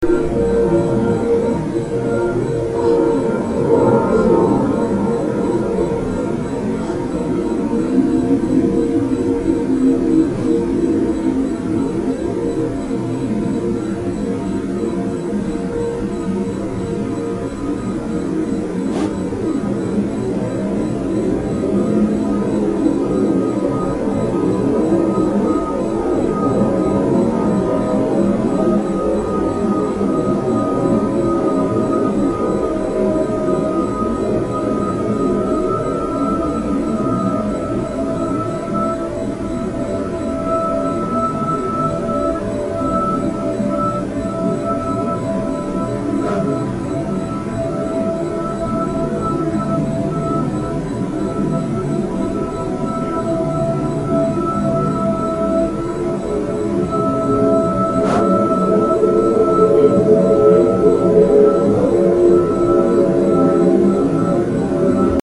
Ambience Space
This is the ambient sound of a space port or something similar